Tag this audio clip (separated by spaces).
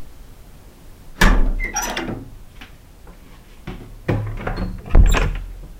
Chimney Door House